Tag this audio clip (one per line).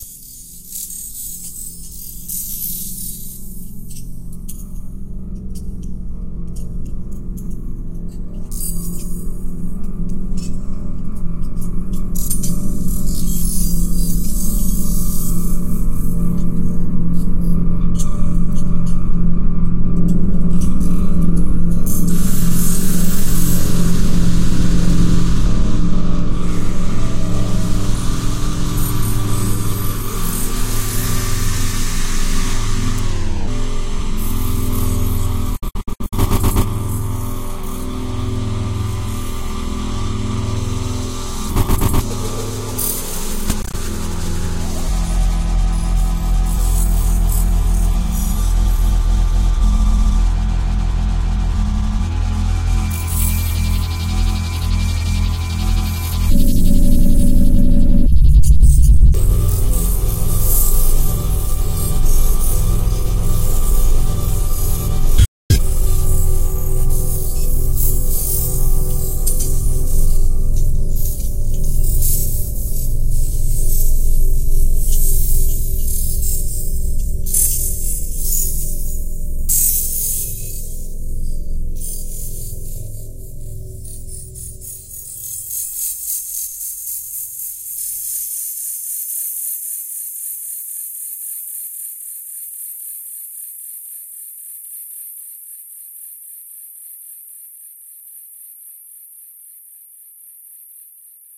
Soundscape
glitchy
stretch
scary
eerie
glitch
horror
glass